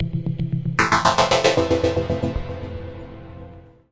MS2000 - ARP 1
A short section of Arp action from my new MS2000R.
FILTERED, MS2000, SHORT